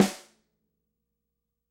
dry snare center 07
Snare drum recorded using a combination of direct and overhead mics. No processing has been done to the samples beyond mixing the mic sources.
acoustic, drum, dry, instrument, multi, real, snare, stereo, velocity